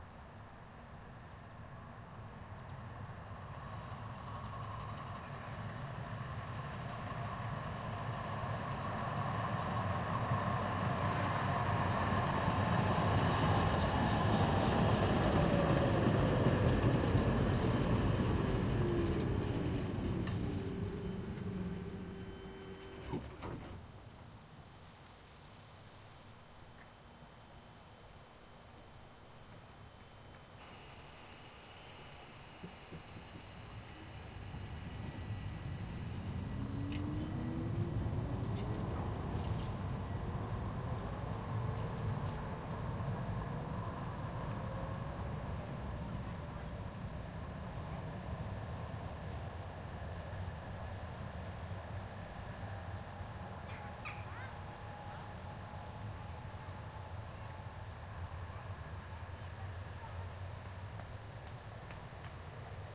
Tram in Norrköping, Sweden.